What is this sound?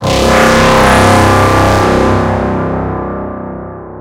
Huge horn I made.
horn, huge, gigantic